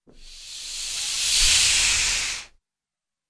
Balloon-Inflate-21
Balloon inflating. Recorded with Zoom H4